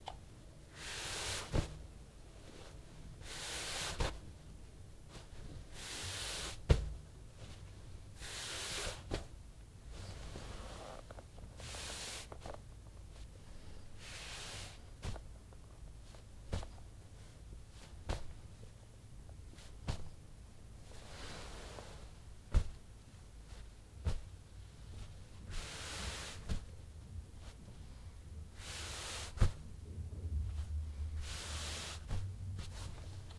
fabric rubbing / pulling
The sound of a hand moving over a fabric and then ending up on trousers. I made it for a scene where a man moves his hands from a table cloth and puts them on his legs.
legs, clothing, moving, fabric, pillow, cloth, sack, bag, hand, trousers, movement, soft, dropping, rustling, friction, rustle, dragging, pulling, rubbing, clothes, textile, rub, bed, shirt, floor, sliding, pushing, pants, object